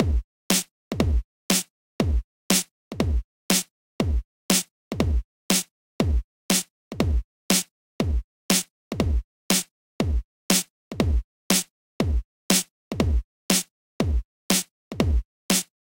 120 bpm kick snare thumper
snare, kick, 120bpm, electronic, drumloop, electro, hop, beat, techno, hip, dance, house, drums, trance, loop, edm, drum